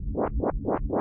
Percussive rhythm elements created with image synth and graphic patterns.